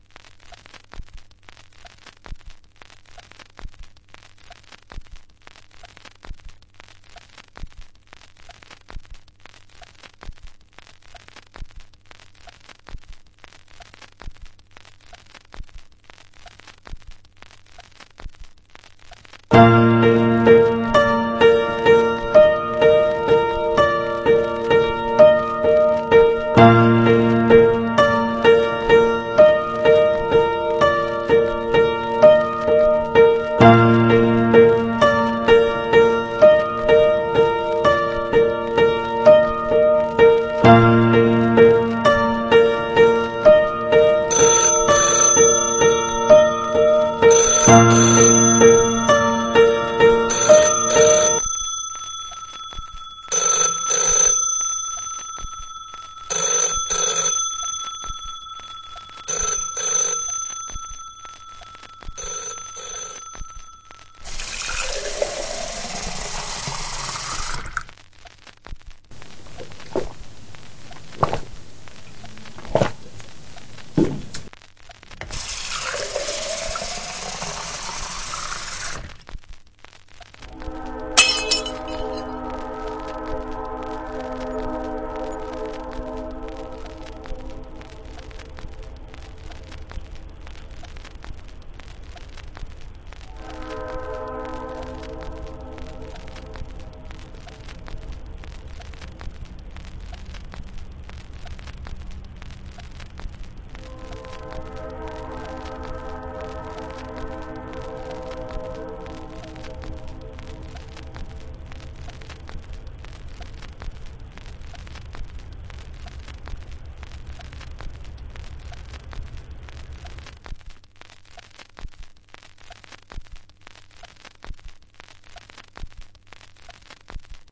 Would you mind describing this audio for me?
LP-Piano-Glass-Phone
A soundscape of a record that's ended, a phone rings, and a passing train startles a person who's holding a glass...
glass, lp, soundscape, train